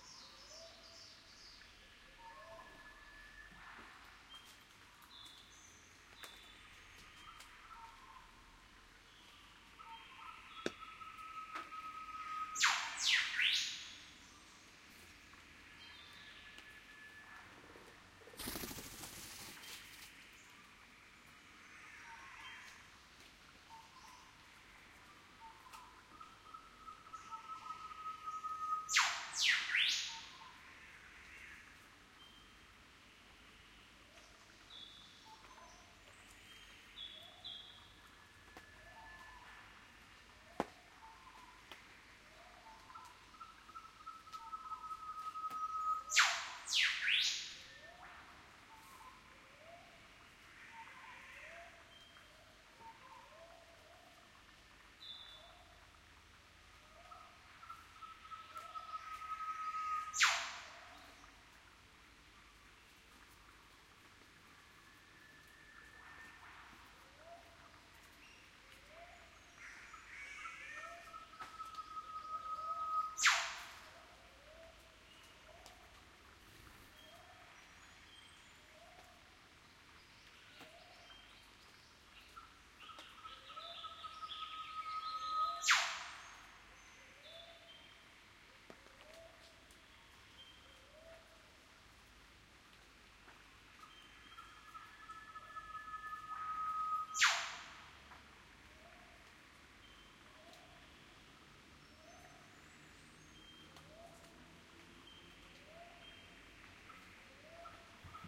A recording of (mainly) a Northern Whipbirds. Recorded near lake Eacham in the rainforest. The fluttering of wings you can hear is from a Riflebird (Ptiloris magnificus). It was lightly raining at the time and a stream is audible. Recording chain: AT3032 microphone pair - Sound Devices MixPre - Edirol R09HR